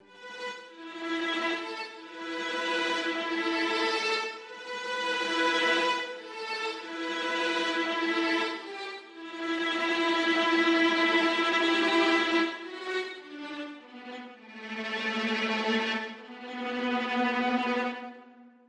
These are string samples used in the ccMixter track, Corrina (Film Noir Mix)
strings, processed, tremolo